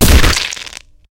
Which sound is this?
GROSS IMPACT
A heavy crushing gore effect, most suited to vehicular assault or if you use the tape effect, it works very well as a bullet impact.
crush, gore, heavy, impact, smack, thud